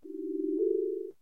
stockhausen reenactment, made in pd. The original was a fysical contruct, this was re-created in Pure Data